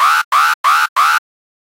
3 alarm short d
4 short alarm blasts. Model 3
alarm, gui, futuristic